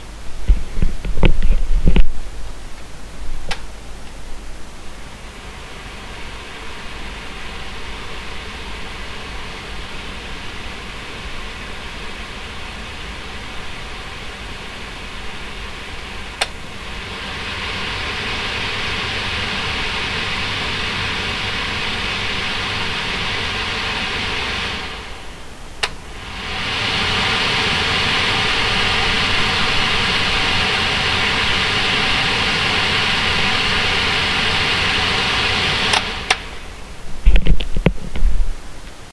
Extractor Sample
This is a sound sample of the Extractor fan above my oven.
extractor, fan